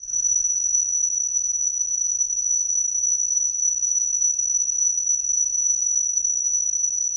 STRINGY-4791-2mx2PR F#7 SW
37 Samples Multisampled in minor 3rds, C-1 to C8, keyboard mapping in sample file, made with multiple Reason Subtractor and Thor soft synths, multiple takes layered, eq'd and mixed in Logic, looped in Keymap Pro 5 using Penrose algorithm. More complex and organic than cheesy 2 VCO synth strings.